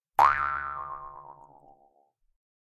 Mouth harp 5 - formant variation
A mouth harp (often referred to as a "jew's harp") tuned to C#.
Recorded with a RØDE NT-2A.